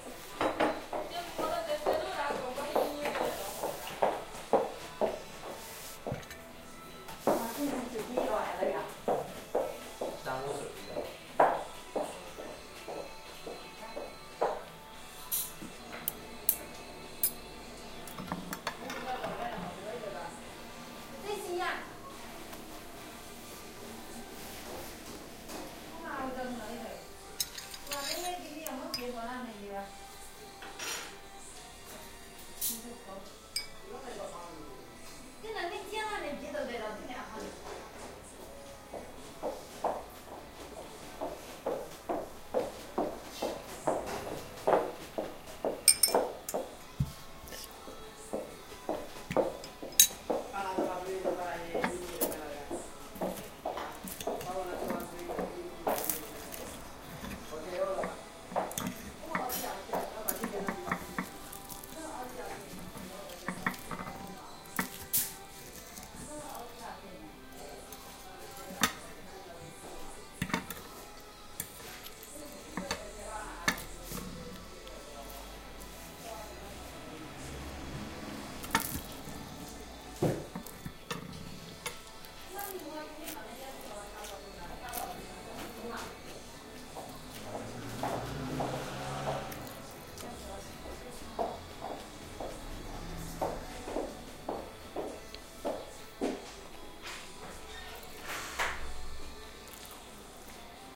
ambiance inside a lonely Chinese restaurant, heel-taping, dishes, cuttlery, male and female voices speaking Chinese, some background music and traffic outside.
voice, field-recording, dishes, chinese, footsteps, restaurant, ambiance